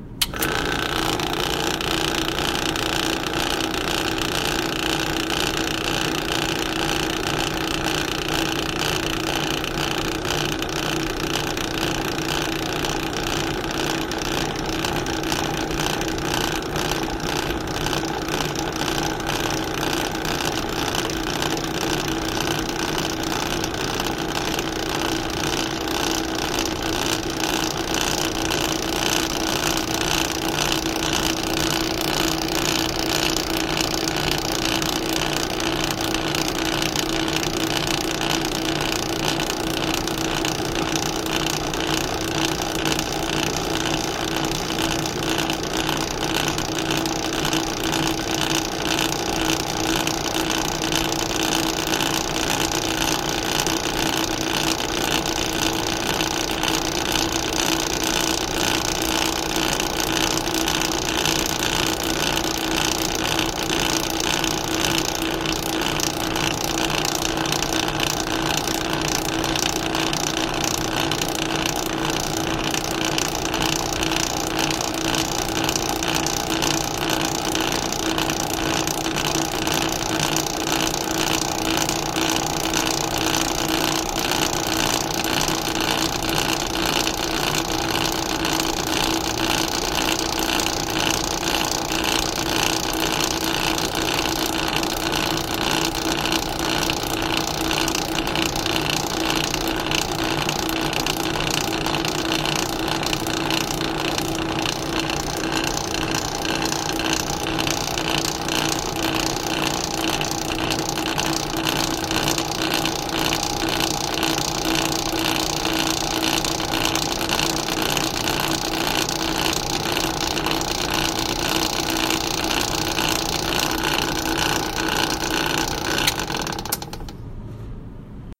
Sound of a blade buffer running, including start and stuff